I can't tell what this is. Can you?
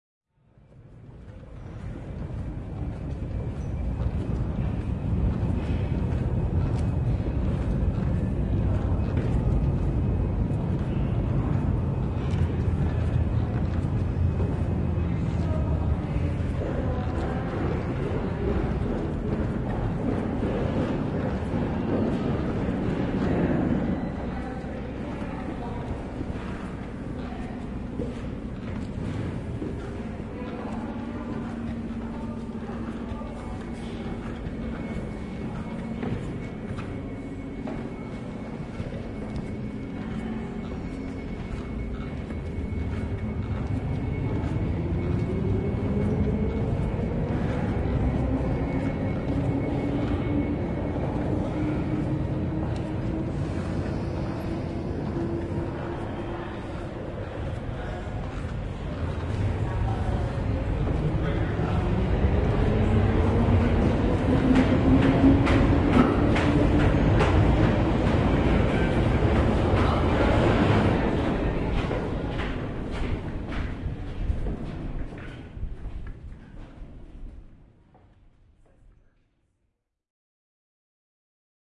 808 Russell Square steps 3
Recorded in Russell Square underground station in London. Instead of the lifts you can choose to descend to the underground platforms via a long spiral stairway - the sounds of the trains and people below are funnelled up through the stairway.